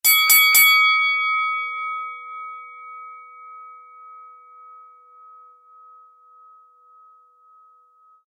Boxing Bell
A dual mono file of a bell striking three times as at the end of a boxing round.
bout, boxing, ding-ding-ding, fight, mono, pugilism, round, three-strikes